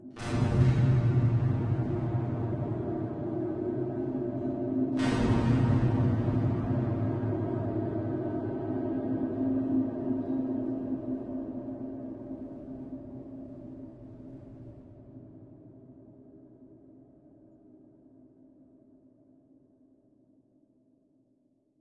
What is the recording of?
LAYERS 001 - Alien Artillery - A#2
LAYERS 001 - Alien Artillery is an extensive multisample package containing 73 samples covering C0 till C6. The key name is included in the sample name. The sound of Alien Artillery is like an organic alien outer space soundscape. It was created using Kontakt 3 within Cubase.
artificial, drone, multisample, pad, soundscape, space